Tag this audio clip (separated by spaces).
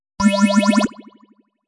game effects soundeffects gameaudio indiegame audio